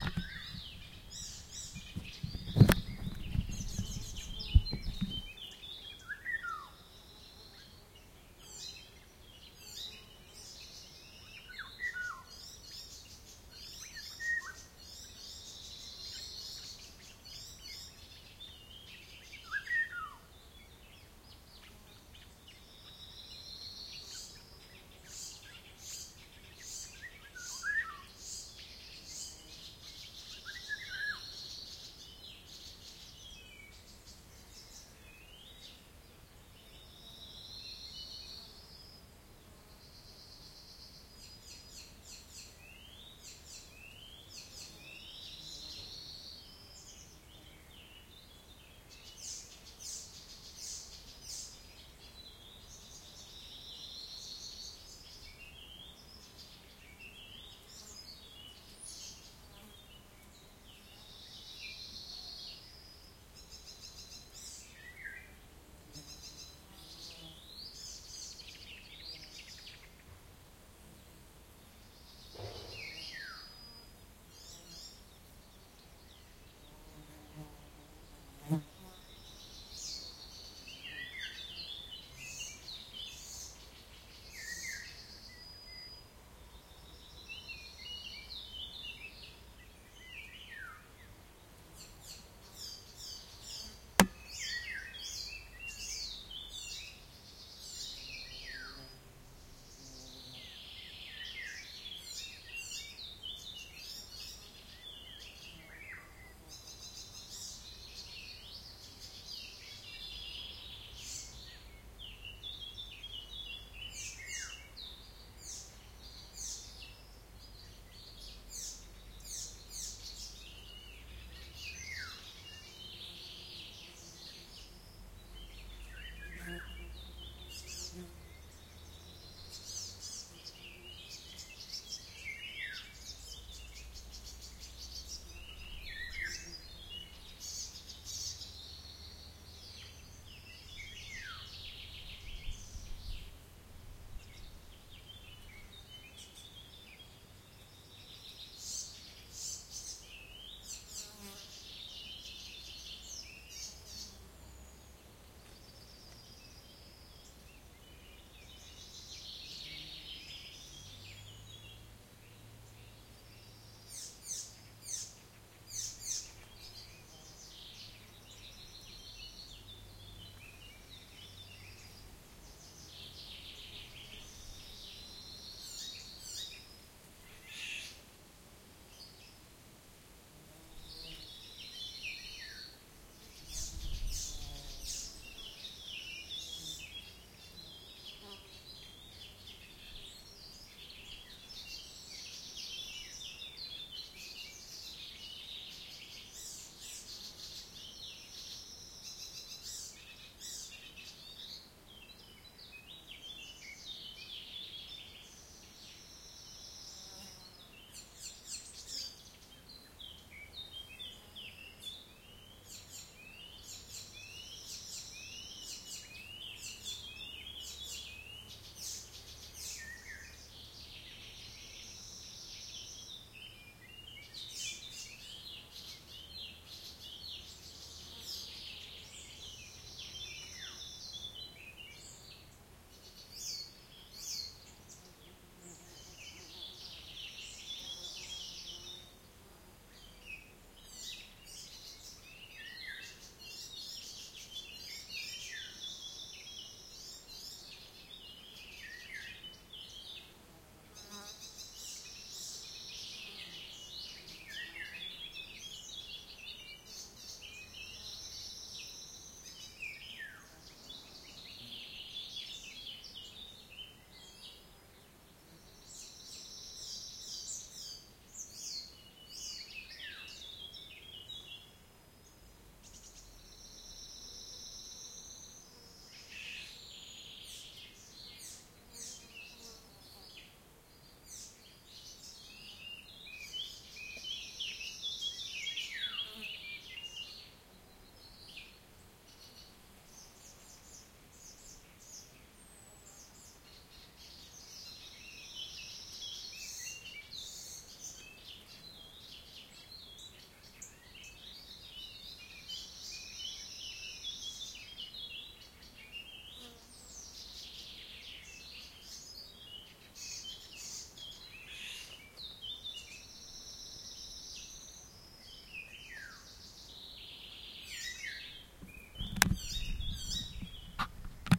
amazing birds singing in Polish forest rear
amazing, bird, birds, birdsong, field-recording, forest, nature, Polish, rear, singing